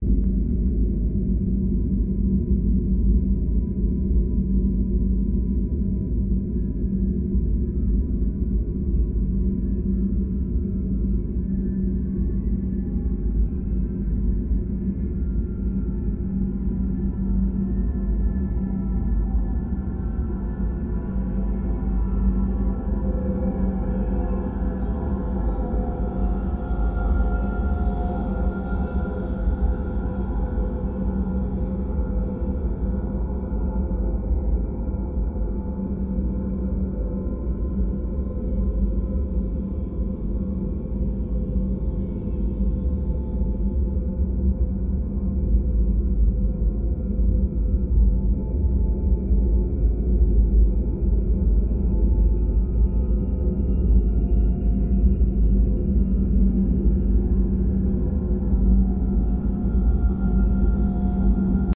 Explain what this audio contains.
CWD LT sphere atm
ambience, ambient, atmosphere, cosmos, dark, deep, drone, epic, fx, melancholic, pad, science-fiction, sci-fi, sfx, soundscape, space